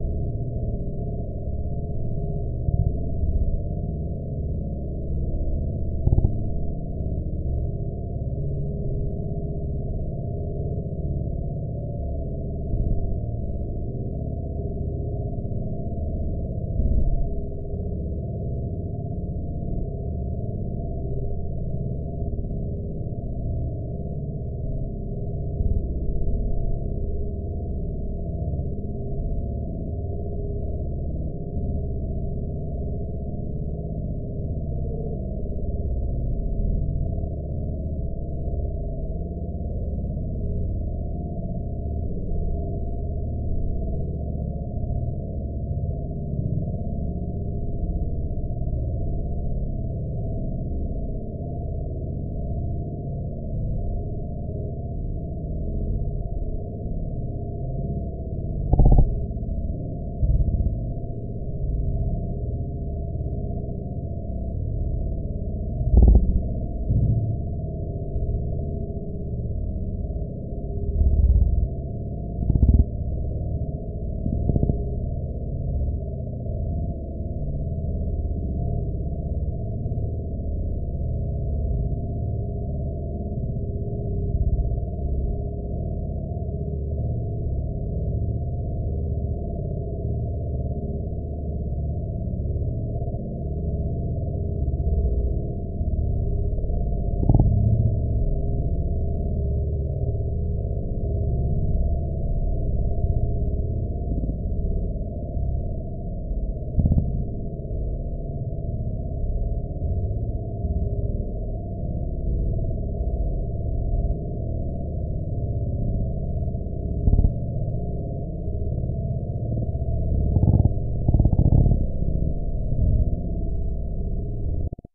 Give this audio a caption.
ZOOM0003 Tr1 trim3
Deck of an alien spaceship